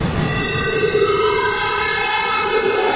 On this one applied a fairly small stretch (8x) with a very small window and a pitch down of 12 semitones (1 octave).